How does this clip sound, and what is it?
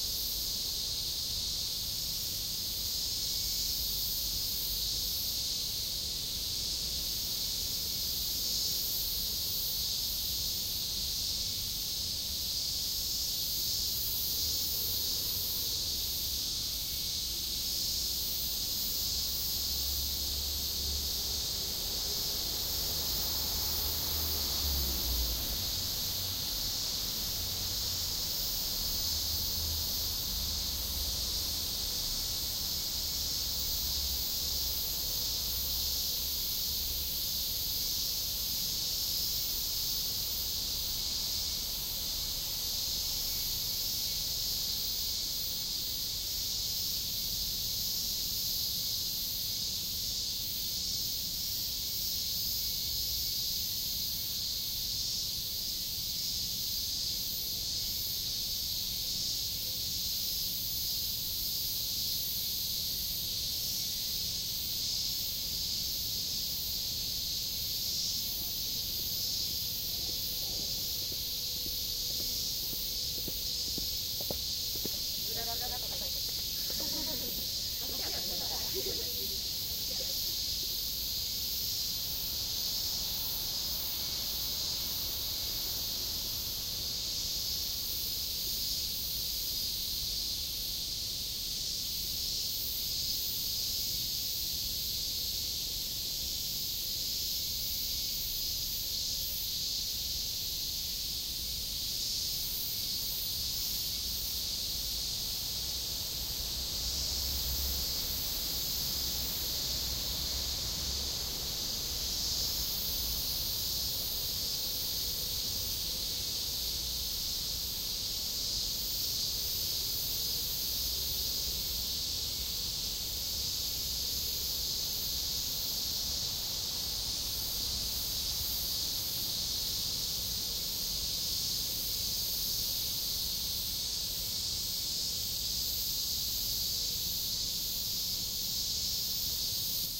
AkasakaHieJinjaCicadas 4mics
The sound of summer in Japan. Surrounded by cicadas everywhere in the quiet grounds of Sanno Hie Jinja in Akasaka, Tokyo I recorded this with 4 mics. Mixed it down and ran it through a compressor/limiter.